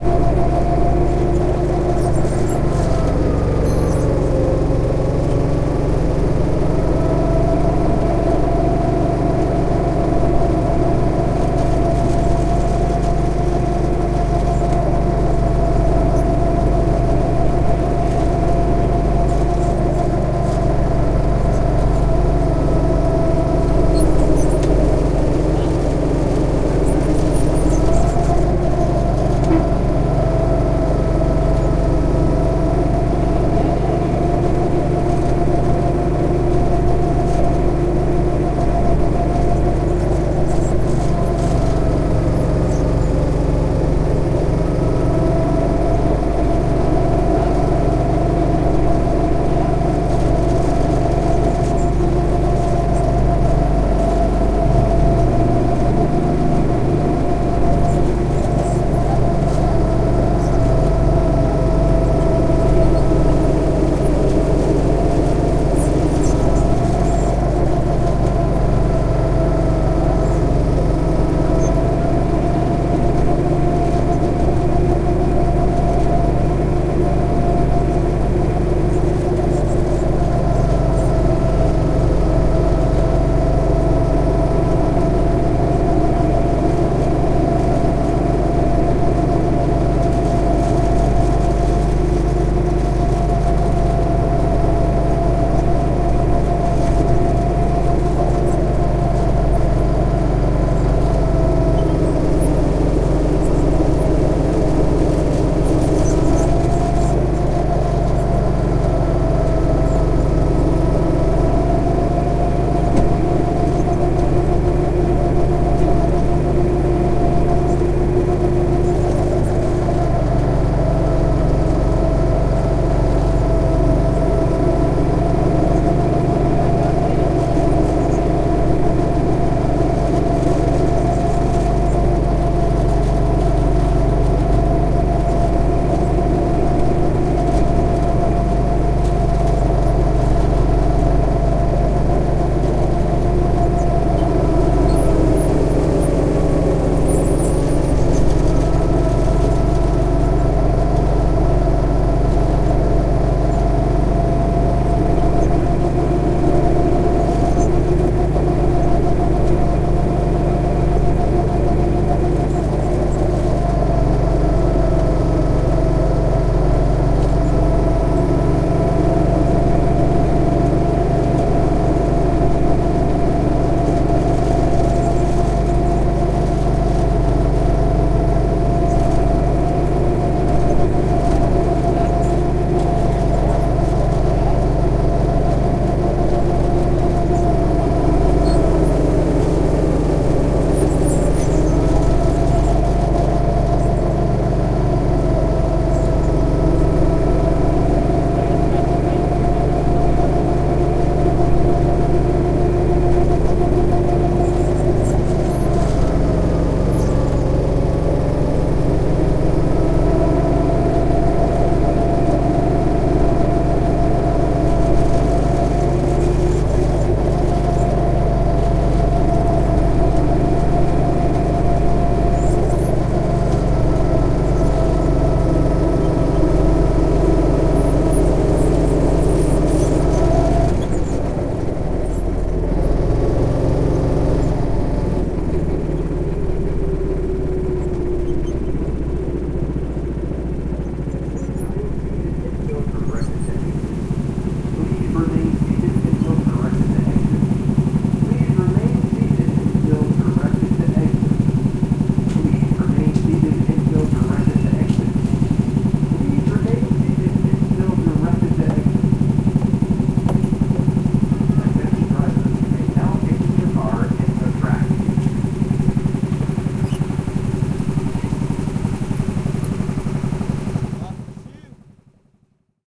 Driving around a go-cart racing track. There are other carts, though they cannot be heard too well. Recorded with r-05 built in microphones.
car, drive, driving, engine, go-cart, motor, race, squeaking, turning, vehicle